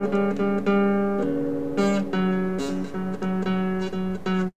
This is a recording of me playing the guitar (actually I can't play the instrument) made down in my cellar with a very bad equipment.
nasty, guitar, sound, bad-recording